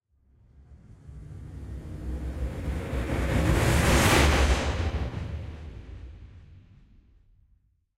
Shock Cluster Hit (120 BPM)
Cinematic Shock Cluster Hit
Cluster, Effect, Hit, Movie